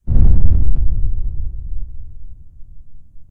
Far Off Boom
Inspired by HerbertBoland's CinematicBoomNorm found here:
I decided to have a go at making something similar. This is the version with amplification added. I just felt the amplified version gave it more "Umph" but decided to ultimately leave it up to whoever decides to use one of these sounds.
away, big, boom, explosion, far, large, off, rumble